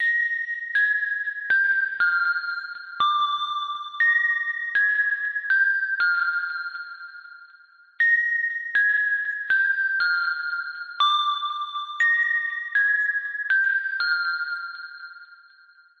A sweet and sad sound, makes me think of an old "music box", or teardrops. 4/4. 120bpm. 8 bars in length.
sad, water, synth, sweet